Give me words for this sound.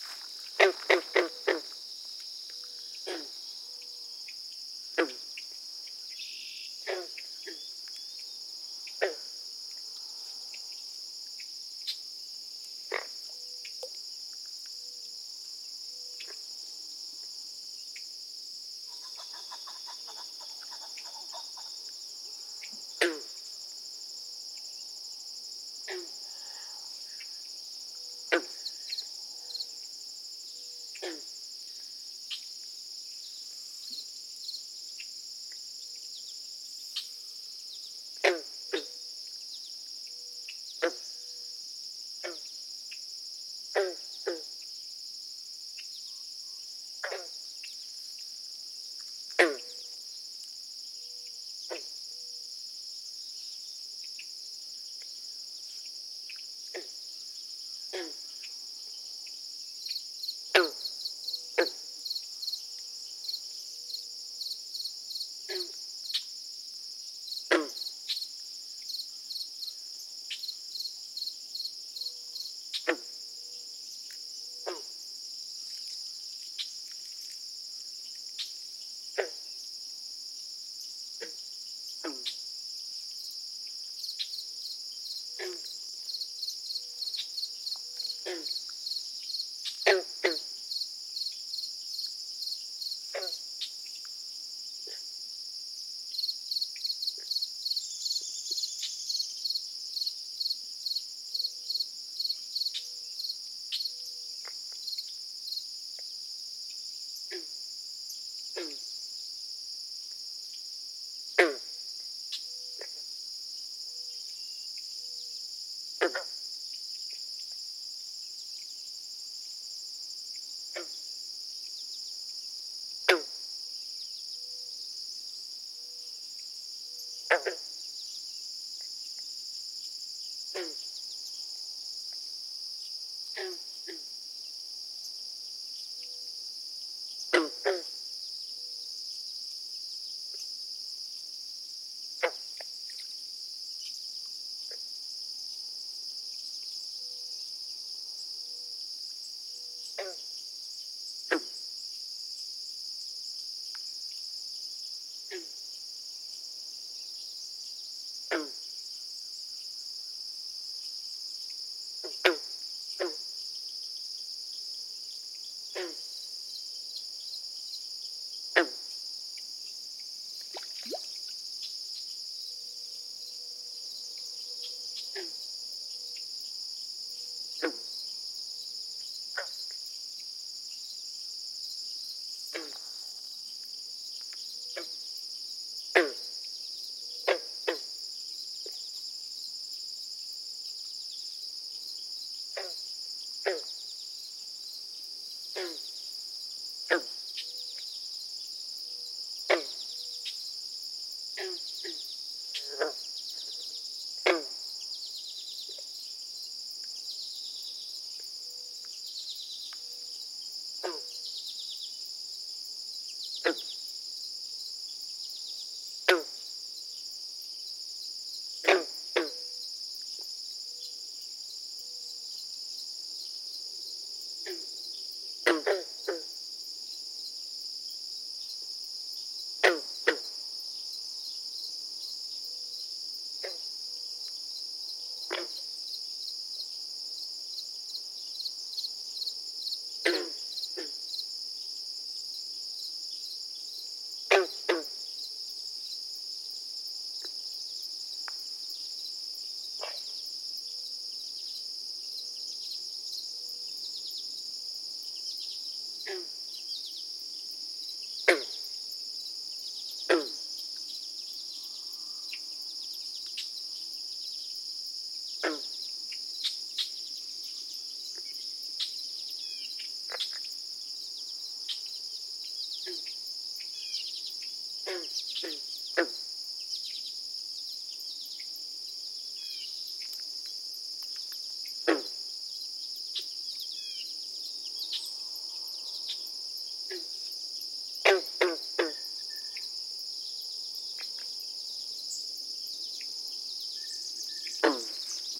Frog Pond 2 - Harvest Moon Trail - Wolfville NS
A field-recording from the Harvest Moon Trail near Wolfville, Nova Scotia, Canada. This frog pond has loads of bullfrogs that sound like plucked, loose banjo strings. About 2:30 in they really get cranked up. At 4:30 a bicycle rides past on the gravel path.
birds, ambiance, nature, bike, gravel, frogs, field-recording